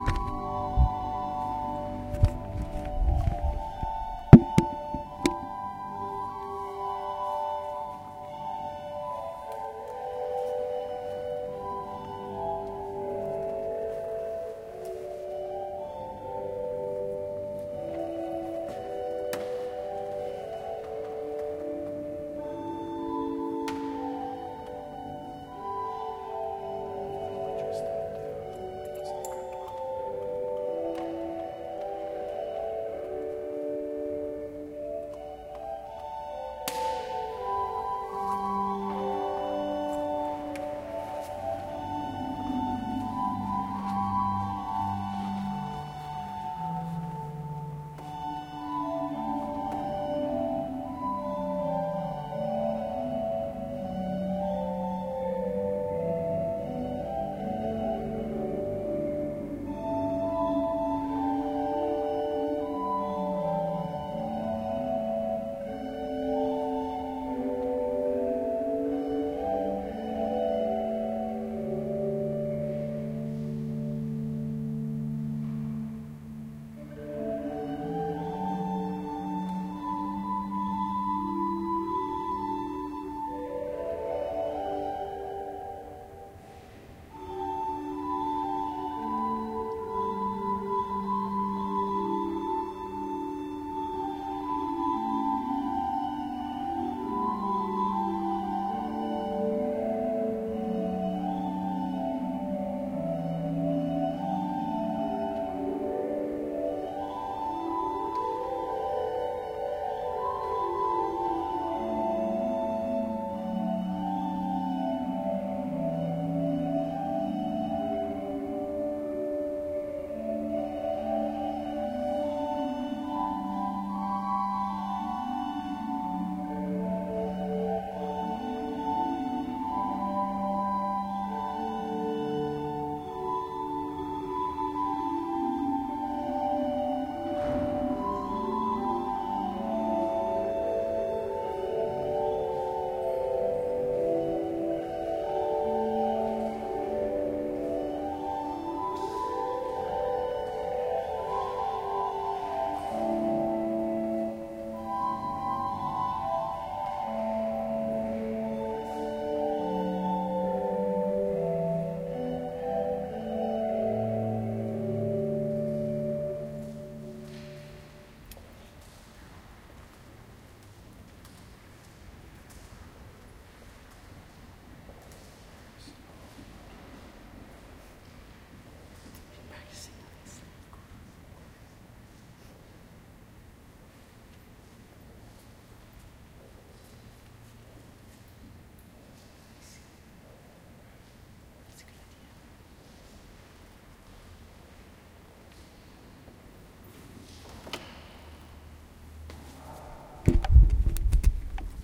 Organ Music-Verona
This was recorded at the San Giorgi in Braida Church along the Adige River in Verona, Italy. I used a Zoom H1 set on the top of a pew a few seats away from where I sat. The organist was practicing at nearly the other end of the church, hence the atmospheric and distant audio quality.
sound, space, Organ, Large